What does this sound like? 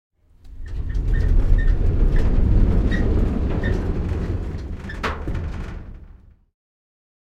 thin metal sliding door opening wheels sqeaking